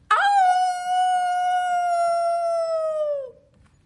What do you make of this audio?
For "Young Frankenstein" I recorded three cast members howling. For play back, I'd vary speakers, delay, volume and echo effects to get a nice surround and spooky sound, that sounded a little different each time.